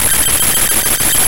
robot sex
Sample made by importing non-audio files (.exe, .dll, etc.) into Audacity as raw data. This creates a waveform whose duration depends on its file size. Zooming in, it's very easy to find bits of data that look different than the usual static. This sample was one of those bits of data.
audacity, chip, chiptune, clipped, distorted, glitch, loop